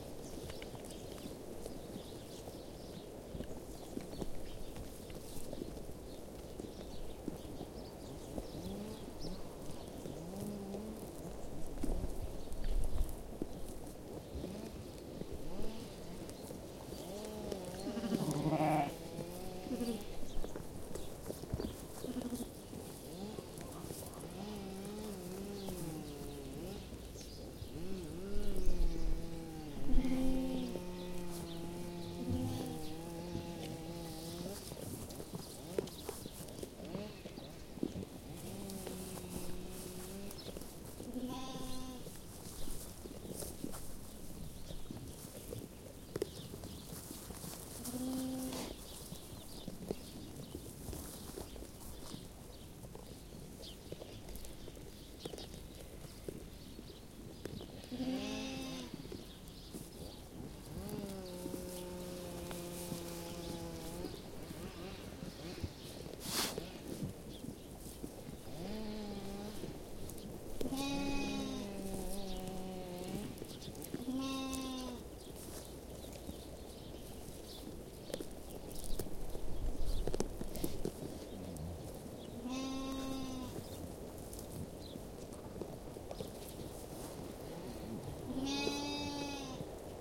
Sheeps eating straw and a chainsaw in the distance
Recorded with a Zoom H1n.
ambience, field, bleat, herd, sheeps, wind, field-recording, eating, baa, dog, rural, interior, sheep, distance, birds, trees, farm, countryside, chainsaw, chewing, pasture, chew, bah, ambiance, lamb, bleating, nature, eat, goat, country